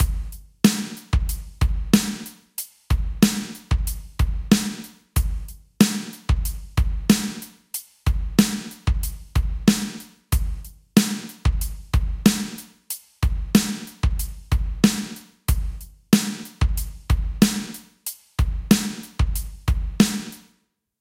80s Drums FL Studio
Created using fl studio fpc and many dsp effects
80s, drummer, drums, groovy, percussive